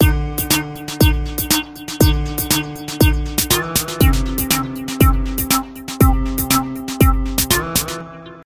120bpm Loop
I created this sound with Fruity Loops and use it as alarm clock